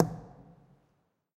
Recordings of different percussive sounds from abandoned small wave power plant. Tascam DR-100.
ambient, drum, field-recording, fx, hit, industrial, percussion, plastic